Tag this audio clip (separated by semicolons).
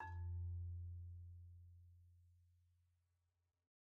idiophone; hit; percussion; pitched-percussion; organic; orchestra; marimba; sample; instrument; mallet; one-shot; percs; wood